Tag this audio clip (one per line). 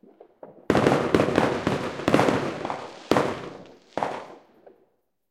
explosion
boom
new
fireworks